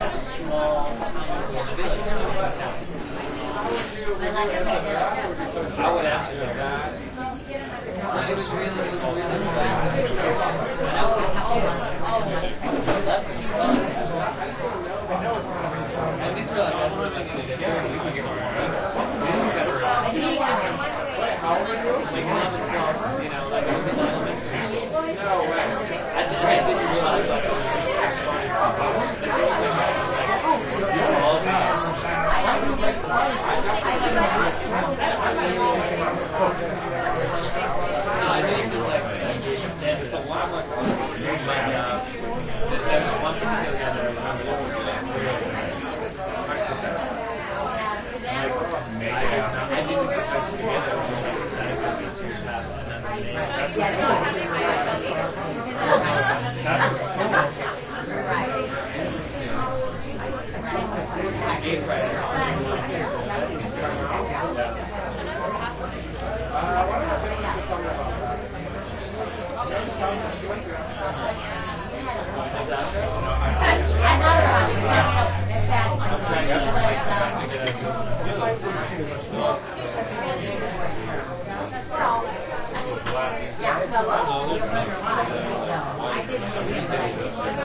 Taken at about 4pm on a Saturday at a popular Mexican restaurant on Palm Canyon Drive in Palm Springs, CA. I used a Tascam DR-07MK2 recorder.